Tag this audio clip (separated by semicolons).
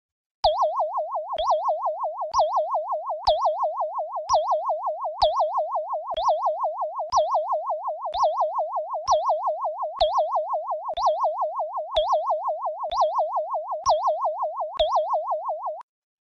computer; weird; aliens; space; laser; ship